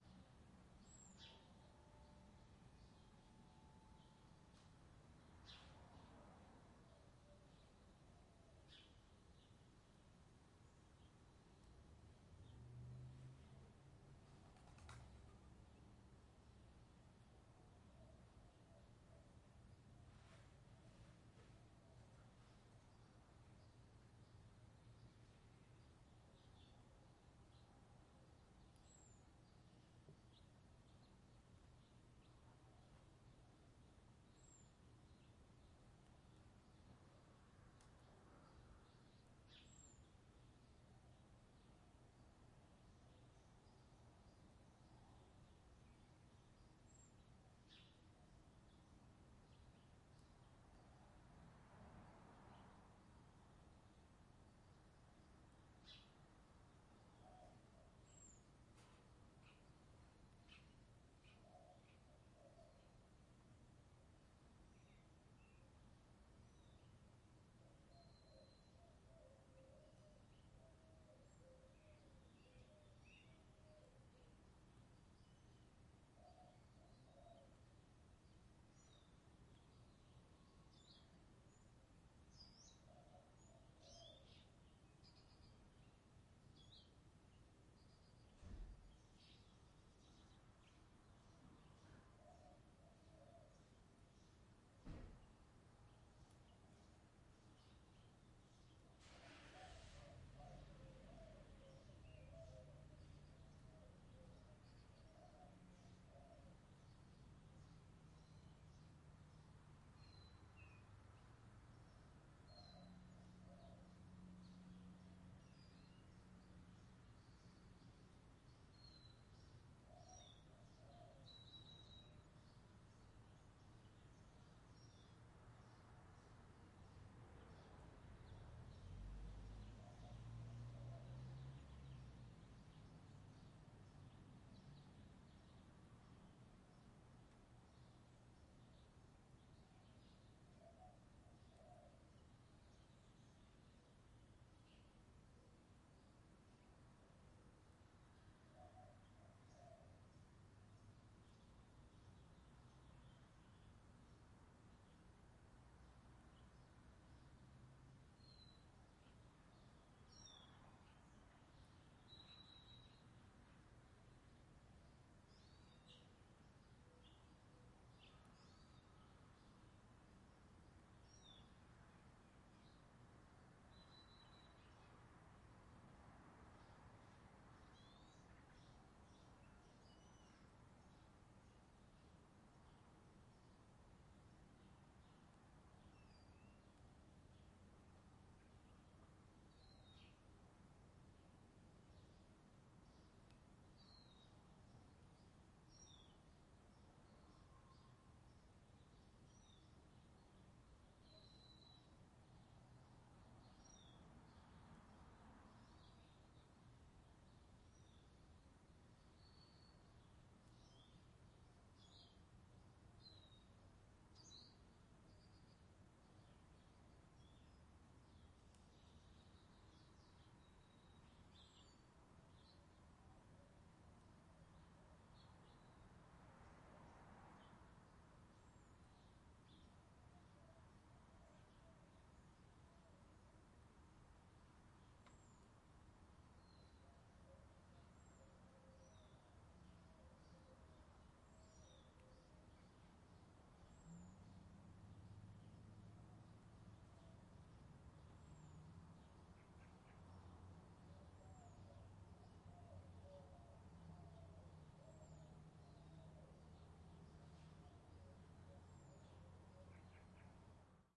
amb, ext, morning, high desert residential, birds, carbys, quad
Recording in residential neighborhood, Albuquerque New Mexico. Quad recording - left, right, left surround, right surround channels. Birds, dove call, quiet carbys. Recording with the Zoom H2n.
Ambience, birds, doves, l, ls, quad, r, residential, rs